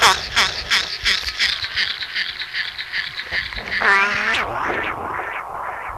fun with a microphone and a reel-to-reel.
voice, sound-effect, tape, sound